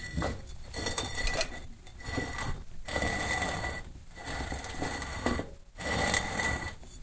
Opening the sarcophagus
Sliding and moving of a heavy stone slab / lid / sarcophagus
casket, catacombs, coffin, Dungeon, egypt, grave, lid, move, moving, open, opening, push, pushing, pyramid, rock, sarcophagus, slab, slide, sliding, stone, Tomb, tombstone